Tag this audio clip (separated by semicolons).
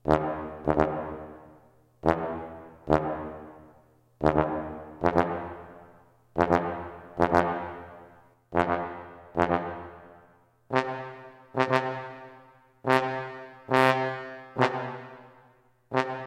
horn; brass; trombone; staccato